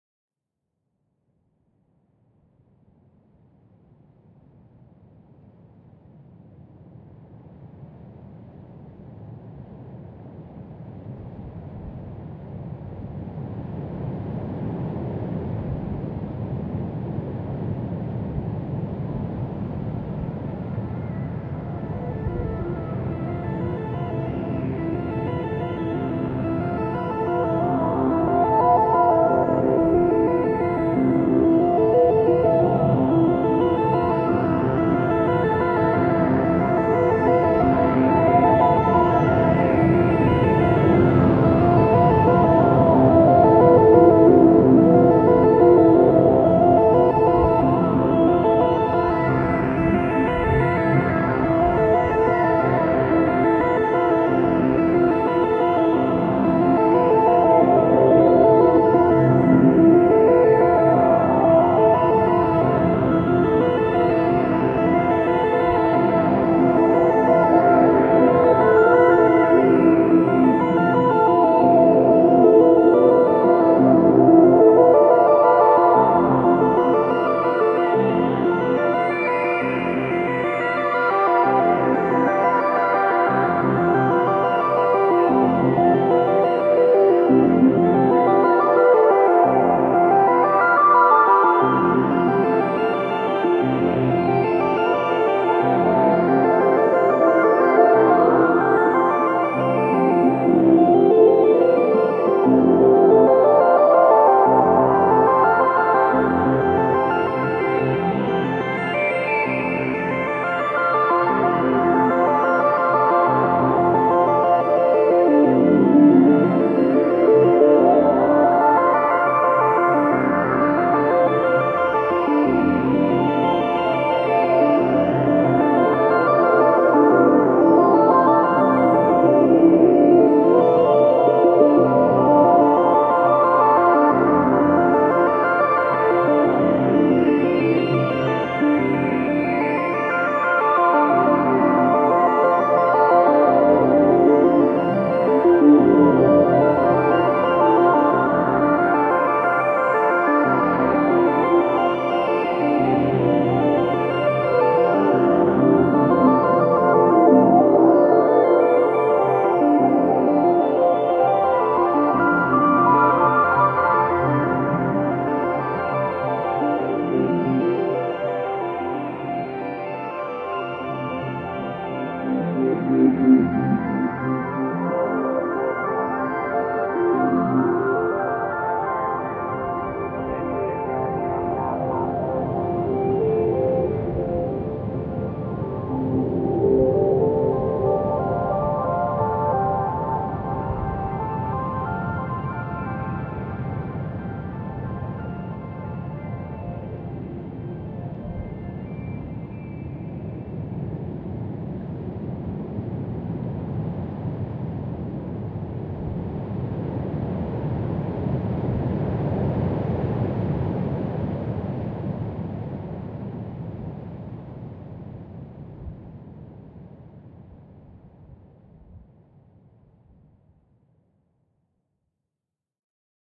Parent Process
Nice music for dreamy/spooky/surreal sequences